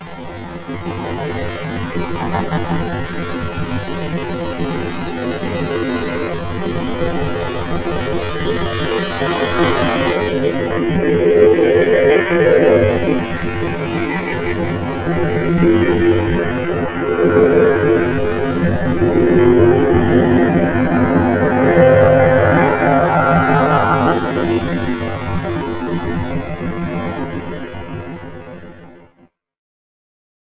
This is a UFO sound created by a waveform generator and manipulated by an audio editor ( I believe I used Audacity) to create an eerie sound of a UFO. I think I was trying to achieve the sound of a UFO hovering over a house. Then flying away. However, if you decide to use this in a movie, video or podcast send me a note, thx.
scratchy, ufo